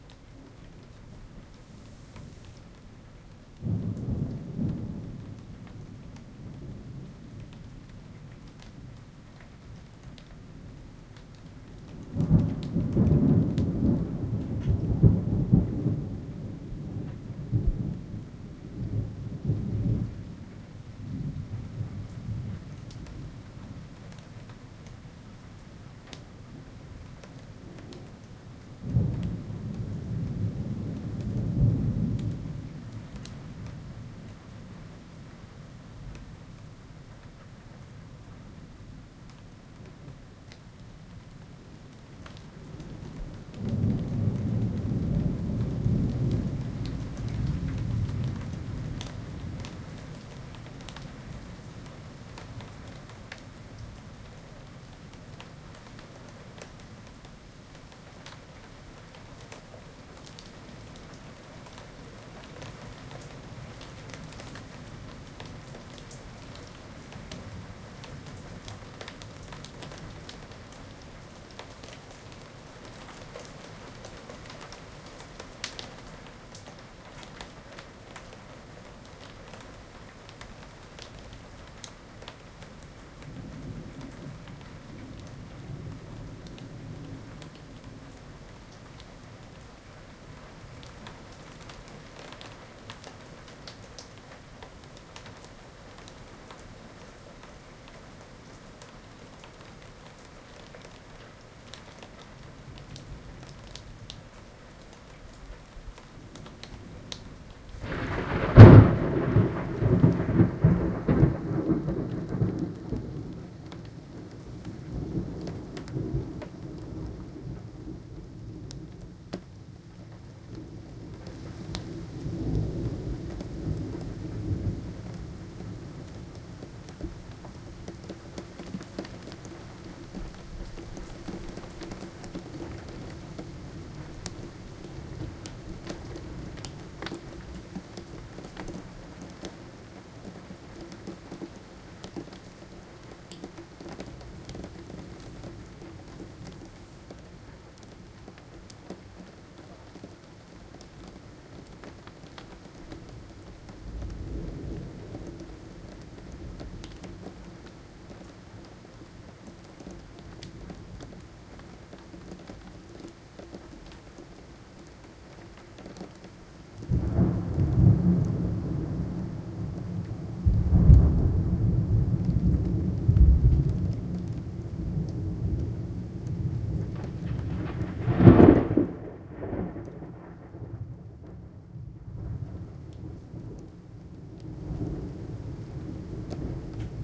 thunder rain1
Storm over a London suburb at night, recorded on a Zoom H1 placed on a window ledge recording through an open window.
I'd love to hear from anyone using this sound, but it's here for anyone to use.
field-recording, h1, rain, storm, thunder, thunderstorm, zoom